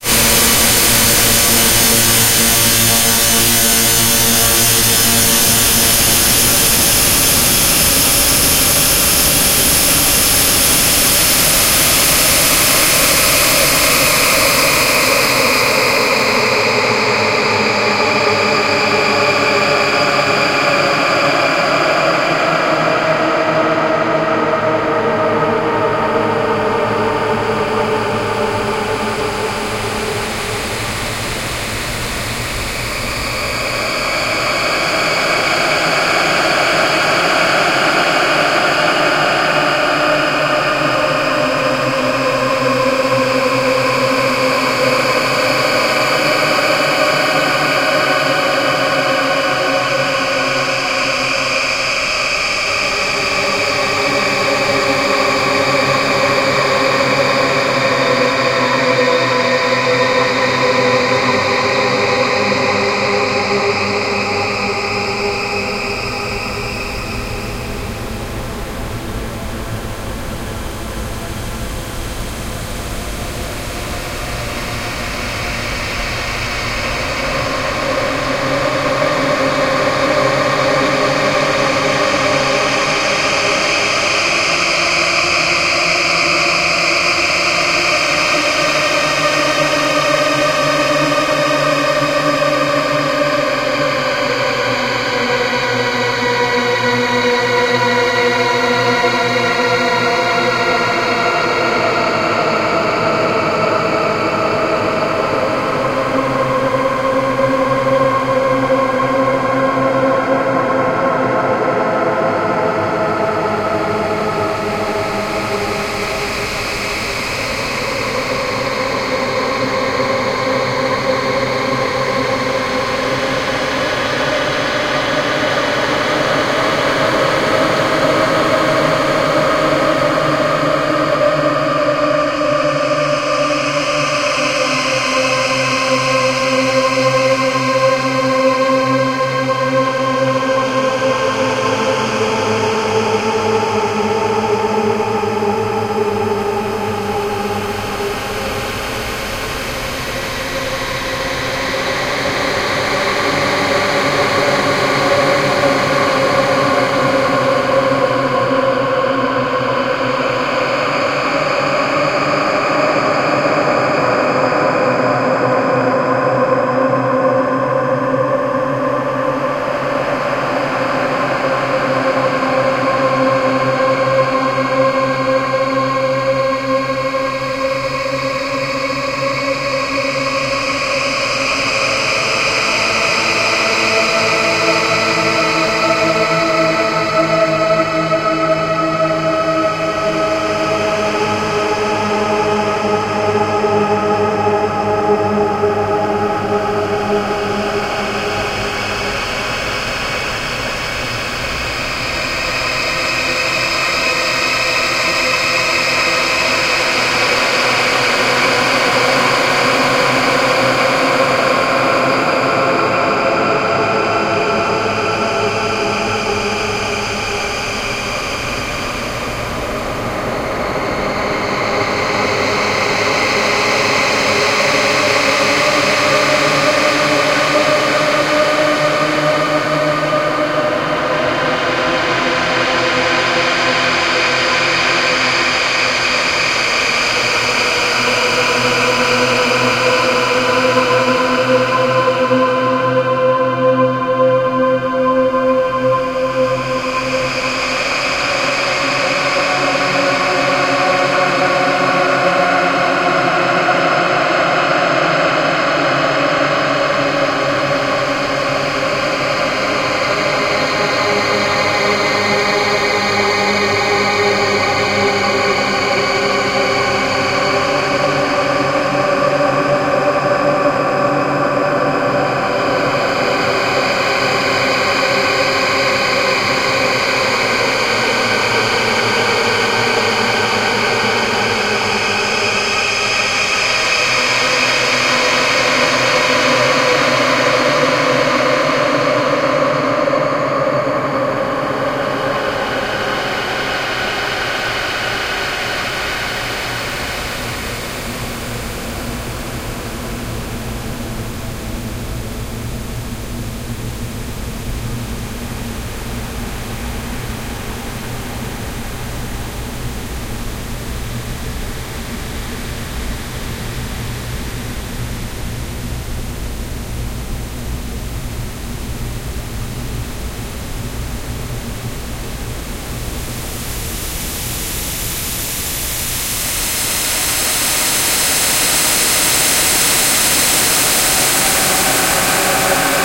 Edited version of one of my office sounds processed with Paul's Extreme Sound Stretch to create a ghostlike effect for horror and scifi (not syfy) purposes.